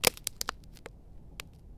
Foot with sneaker cracking an ice sheet, outdoors.